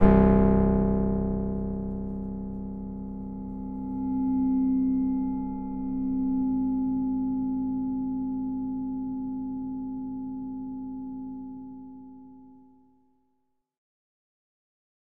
ae feedback
Created with Reason using several different effects